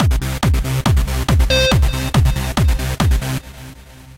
Dance Pt. 7
mixture of steps 1,2 and 5. Oscillator kick 01 used because it is great.
beat, progression, drum, melody, trance, bass, drumloop, techno